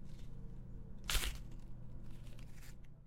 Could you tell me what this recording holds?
A sheet of paper hitting some thing